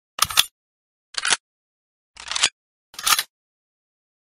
awp reload sound 2
Version 2 of the Awp sniper reload sound
AWP, L96, Reload